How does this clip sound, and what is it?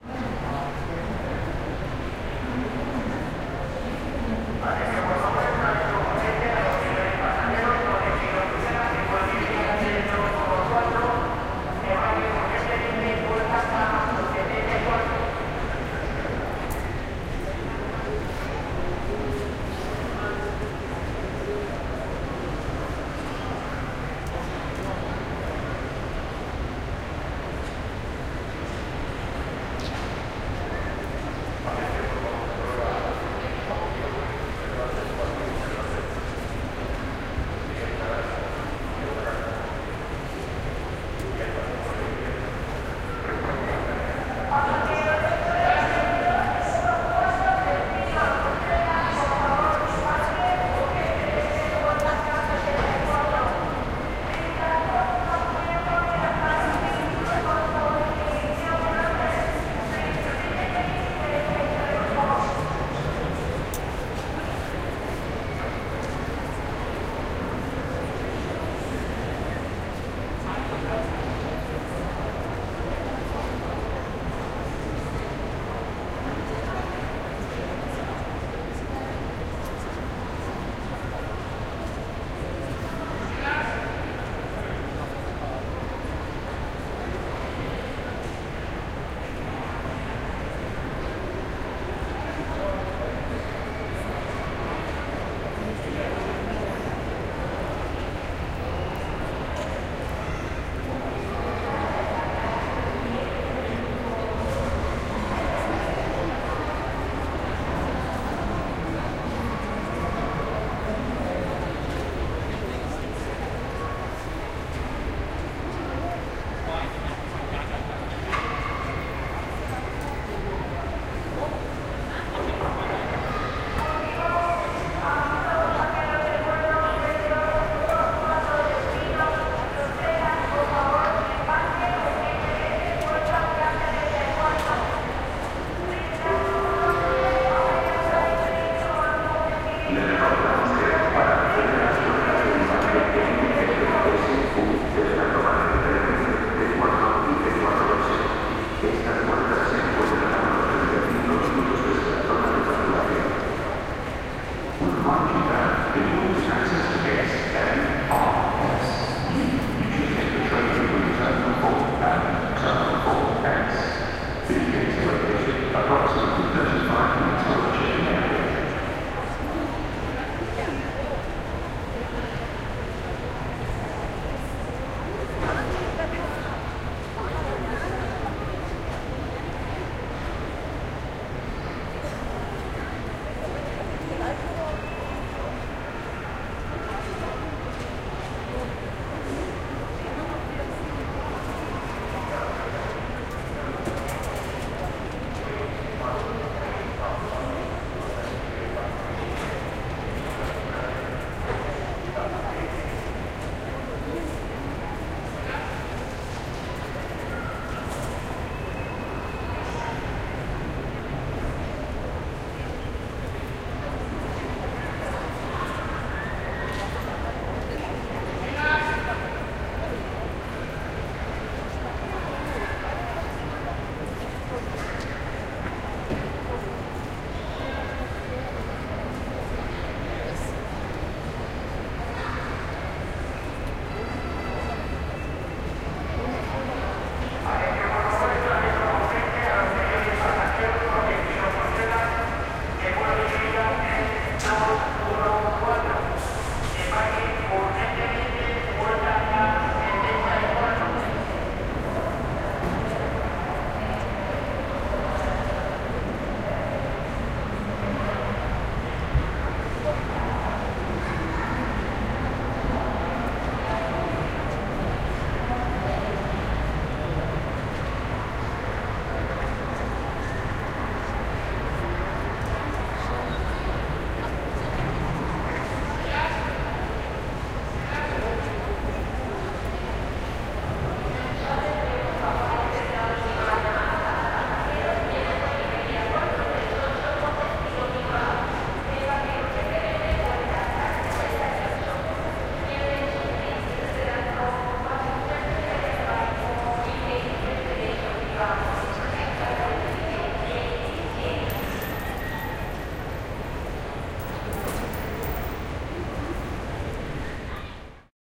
Recorded in august 2016 at Madrid airport with a ZOOM H6 (probably MS mic/RAW)
ambient, reverb, field-recording, airport, people, noise, announcement, ambience